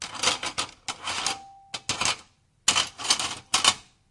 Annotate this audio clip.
Rummaging and sliding
objects, clatter, rummage, random, rumble